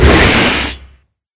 science-fiction fantasy film designed